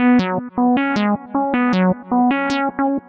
wave from 156
riff, 156, bpm, metal, trance, house, hard, rock, rave, loop